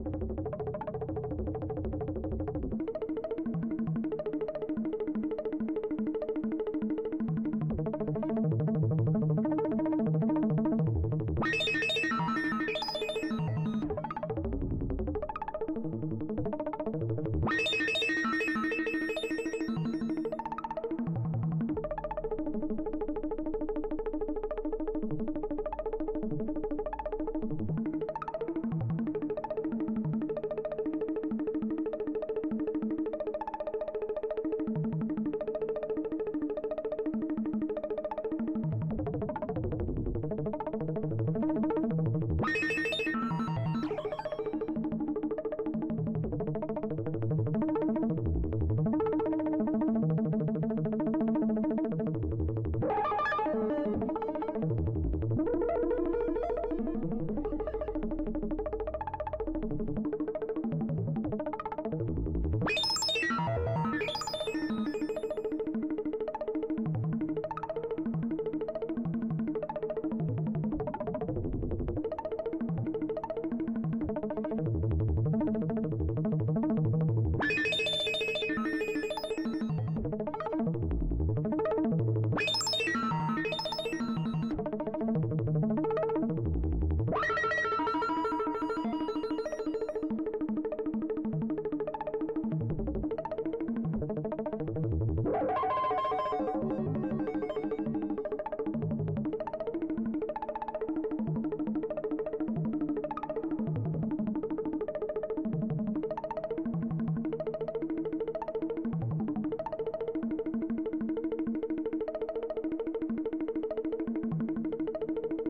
Mini Sequence FM
A Fast Sequence on a doepfer modular
FM -> VCA -> Waveshaping -> lopass gate
Spring Reverb
Bell, doepfer, FM, Modular, Noise, noodle, sequence, Synth, synthesis, Synthetic, Synthetizer, west-coast-synthesis